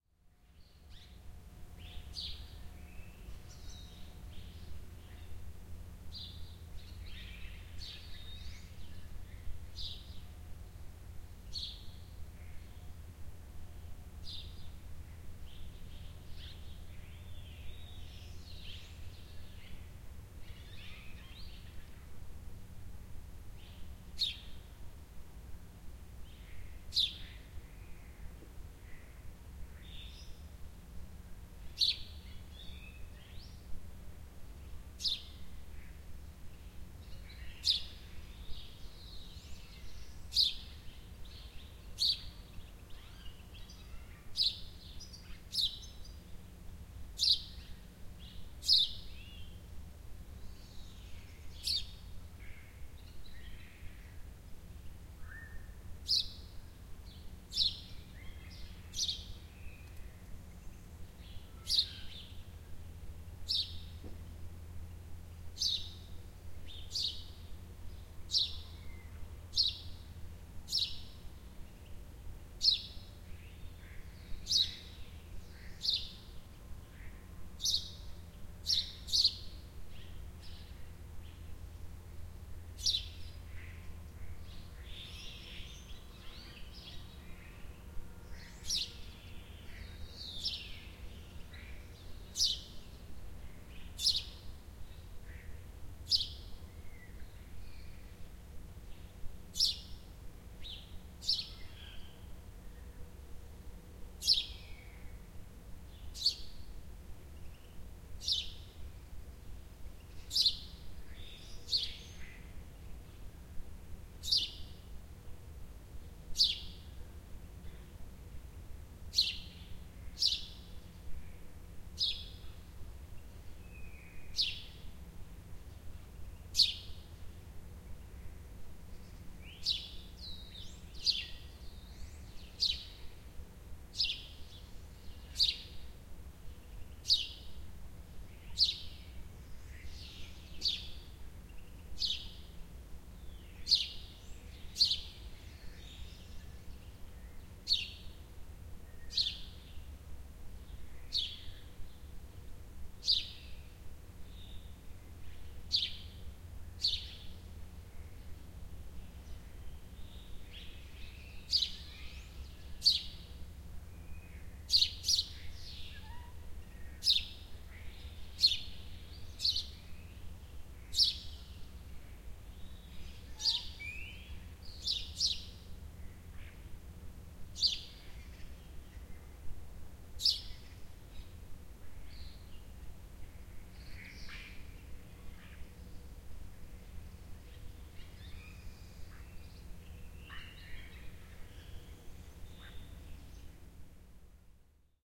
Morning Birdsong, Spain
Plenty of birds singing in an urban environment before anyone else wakes up. There is a very faint background hum from the pool pumps.
A few miles from Murcia City, Murcia, Spain.
Recorded on a Zoom H1 with internal mics.